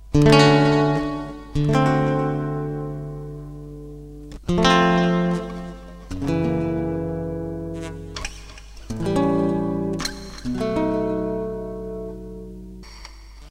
slow, dreamy, 7th chords played on a nylon string guitar.
chord, nylon